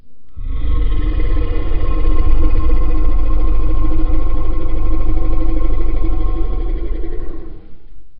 Monster growl
A simple growl to be used for large creatures
animal, beast, beasts, creature, creatures, creepy, dinosaur, dragon, groan, growl, growling, growls, horror, lion, monster, noise, noises, processed, roar, scary, snarl, tiger, vocalization, voice, zombie